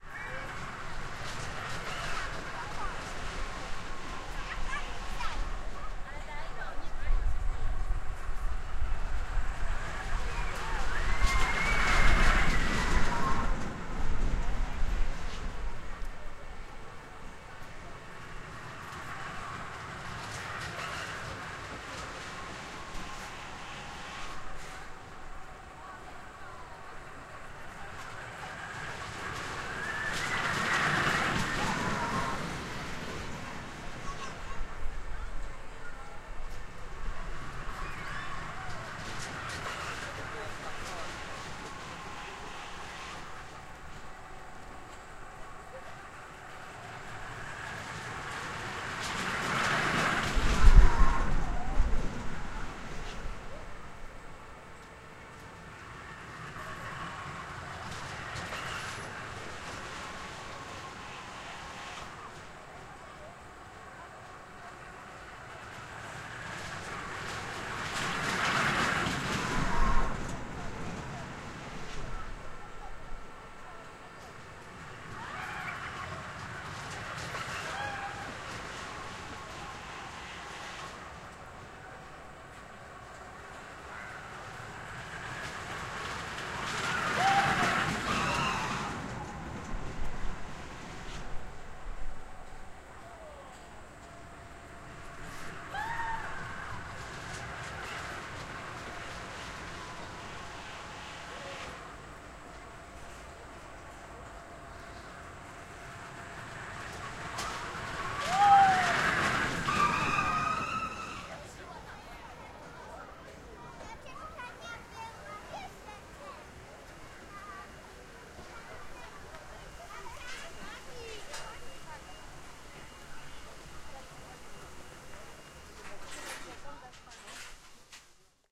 carousel, train, carriages, amusement park, holiday wine, walk, market, mall, Poland
Poland, amusement, carousel, carriages, holiday, mall, market, park, train, walk, wine